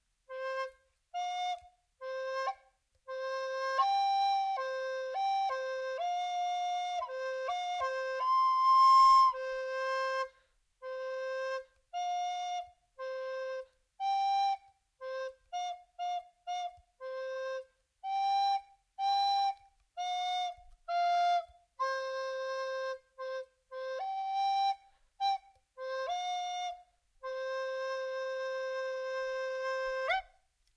Improvising with recorder
Me improvising song on the recorder.
Flute, Random, Play, Improvising, Notes, Playing, Recorder, Music